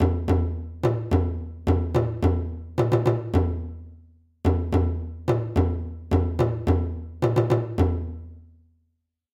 Krucifix Productions 2018 African Drum Beat
Just an African sounding drum loop that I composed